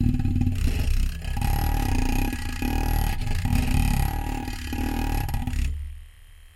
MILK FROTHER ON MIC CABLE 2
Took hand held electric milk frother and played the mic cable with it. Sounds like a construction site.
drill, motor, noise